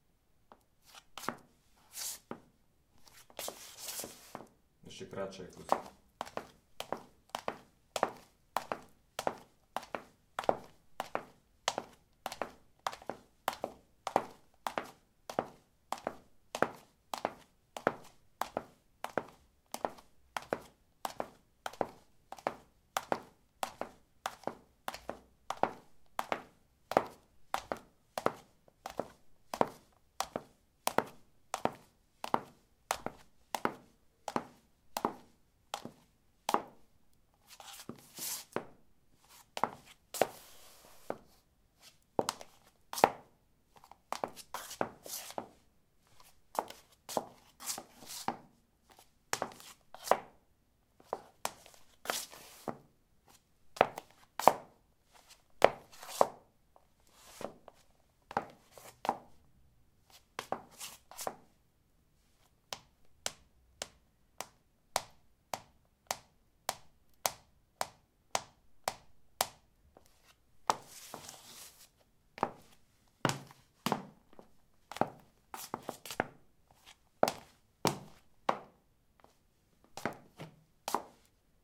ceramic 09b highheels shuffle tap threshold
Shuffling on ceramic tiles: high heels. Recorded with a ZOOM H2 in a bathroom of a house, normalized with Audacity.
steps, footsteps, footstep